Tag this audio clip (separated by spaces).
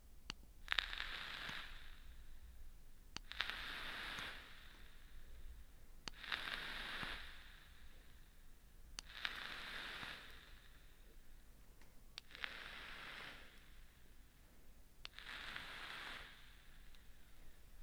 cigarette electronic smoking inhale vaporizer foley